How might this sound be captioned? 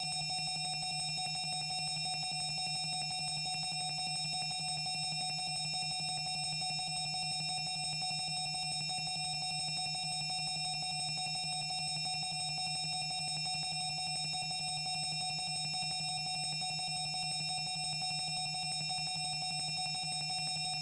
A futuristic alarm sound